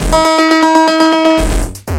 Computer beat Logic